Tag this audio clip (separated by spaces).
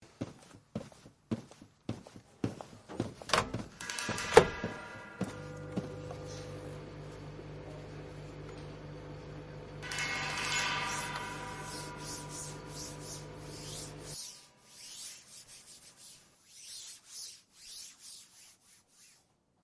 France Soundscapes Pac